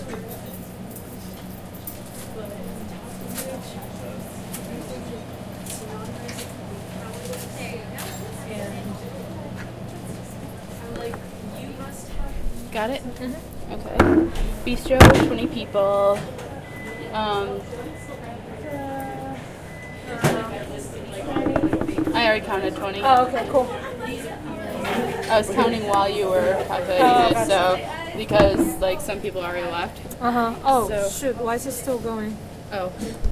bistro, ambient noise, talking
It is a small area within a 'coffee shop'. Very busy with many people coming and going, small chatter occurs, (Probable dialogue between recorders at end). Recording at midlevel
medium-size, conversation, footsteps, coffee, internal, talking, speaking, cacophonous